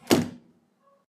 sonido cerrar puerta